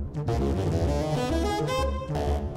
sax realtime edited with max/msp